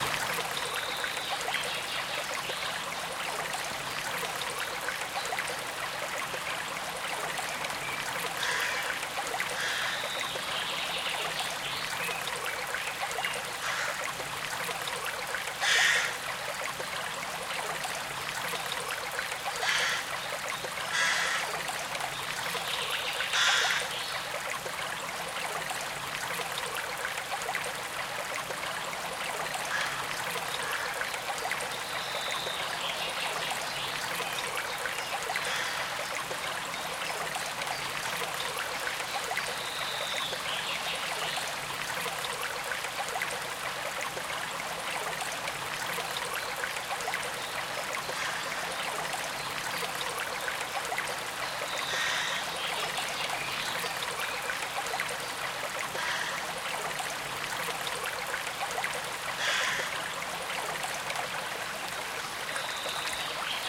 Gentle Stream Natural Stream Sound
Relaxing soothing sound of a gentle stream in the Scottish borders. Flowing water Natural sounds of Scotland.
Album: Sounds Of Scotland Vol 1(2018)
Enhance your well-being and feel more comfortable with relaxing sounds of nature. Running water river stream recorded in Duns, Scotland.
Nature sounds have a positive effect on the mind and body. Bring nature inside - or anywhere you go.
Experience the benefits of nature sounds, all day or overnight.
Promotes rest, peace of mind, and good overall health.
woodlands, day, flowing, splash